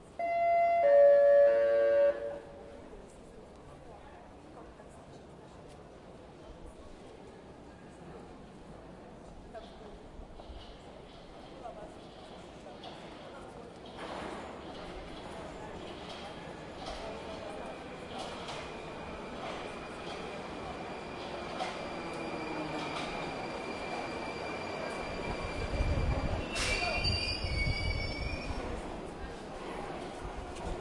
PA and arrival
Ambience from russian Underground station, PA bell in the begining with no voice, then quite mur-mur and at the end train arrival.
Record from zoom h4n, low freqs were cut a little bit because mic was without dead cat - so had noise wind from the train
announcement, Field-record, metro, public, ambience, underground, subway, PA, train